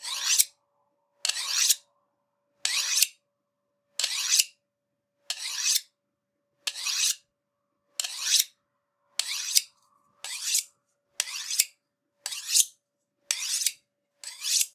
Sharpening a classic 6" carving knife with a "steel"